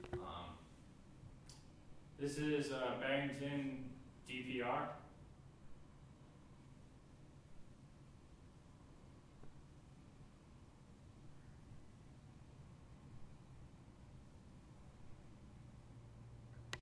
Classroom High Ceiling
-Ambient noise of a classroom in Barrington Center of the Arts at Gordon College.